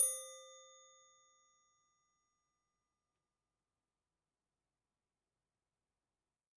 Soft wrench hit C3
Recorded with DPA 4021.
A chrome wrench/spanner tuned to a C3.